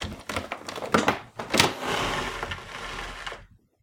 Door-Wooden-Heavy-Open-01
Here we have the sound of a heavy front door being opened.